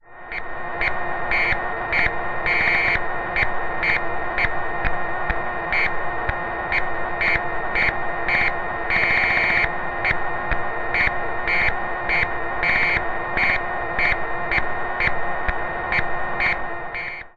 Console of spacecraft with whirring and bleeps. Made on an Alesis Micron.